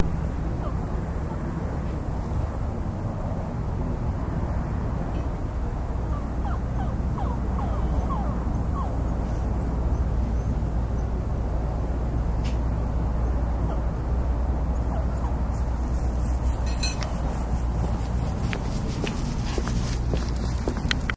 SonyECMDS70PWS cryingdogg clangingneighbor
digital, microphone, test, dog, electet, people, field-recording